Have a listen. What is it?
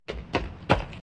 Running up stairs foot foley.
boot, run, dead-season, foley, fast, stairs